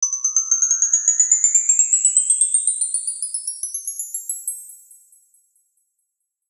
Bar Chimes V8 - Aluminium 8mm - wind
Recording of chimes by request for Karlhungus
Microphones:
Beyerdynamic M58
Clock Audio C 009E-RF
Focusrite Scarllet 2i2 interface
Audacity
bar, bell, chime, chimes, chiming, glissando, metal, orchestral, percussion, ring, wind-chimes, windchimes